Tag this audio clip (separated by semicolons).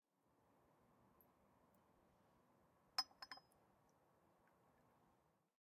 teacups; water